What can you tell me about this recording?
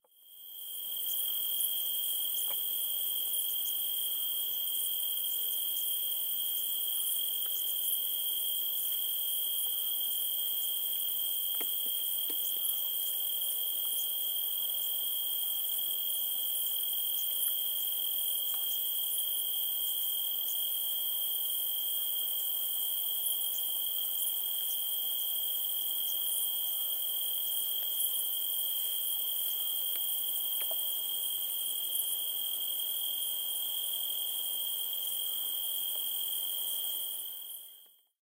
amb-night-cricets montenegro
Field recording, night, crickets, insects, ambiance, Montenegro 2010. Recorded with Zoom H4n.
ambiance, crickets, field-recording, night, summer